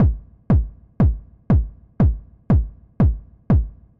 Kick house loop 120bpm-02
120bpm, loop, kick